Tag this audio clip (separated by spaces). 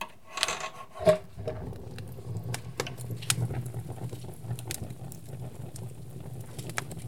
burn burning combustion crackle crackling fire fireplace flame flames hot logs open smoke spark sparks stove